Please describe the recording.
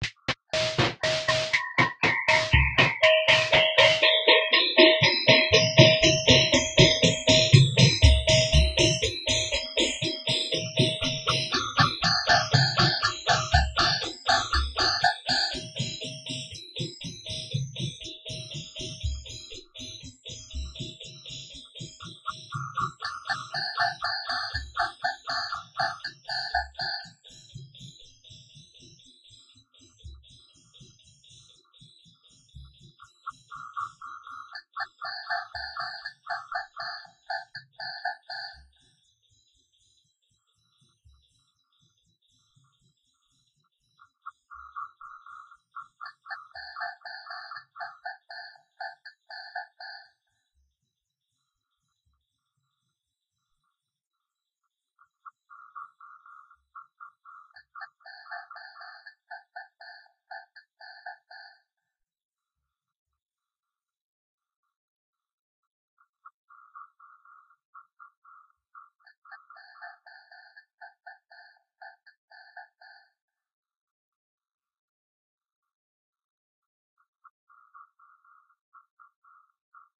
DISTOPIA LOOPZ PACK 02 is a loop pack. the tempo can be found in the name of the sample (60, 80 or 100) . Each sample was created using the microtonic VST drum synth with added effects: an amp simulator (included with Cubase 5) and Spectral Delay (from Native Instruments). Each loop has a long spectral delay tail and has some distortion. The length is exactly 20 measures at 4/4, so the loops can be split in a simple way, e.g. by dividing them in 20, 10 or 5 equal parts.